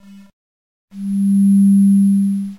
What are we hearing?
bobbingsine-chiptone
lo-fi, chip, game, video-game, 8-bit, arcade